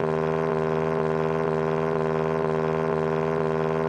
Vehicle's engine high rev noise